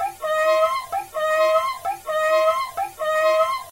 Looped elements from raw recording of doodling on a violin with a noisy laptop and cool edit 96. Rocking chair like squeaky crying machine sound.
noisy, loop, machine, violin, sad, cry